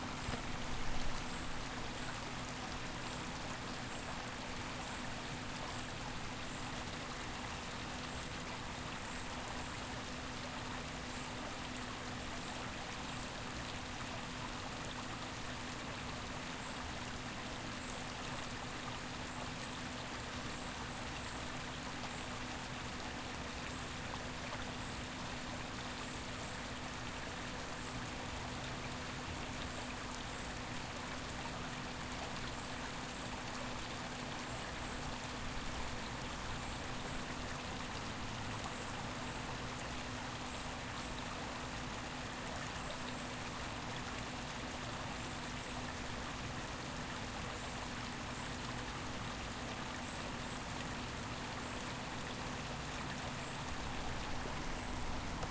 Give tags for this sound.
water; creek; flowing; stream; river

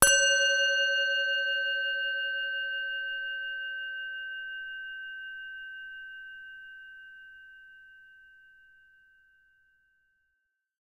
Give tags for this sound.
bell; tibetan-singing-bowl

